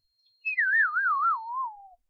Falling from a height.